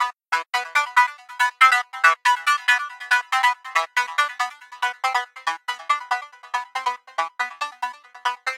TR LOOP 0409
loop psy psy-trance psytrance trance goatrance goa-trance goa
goa, trance, goatrance, psy, psy-trance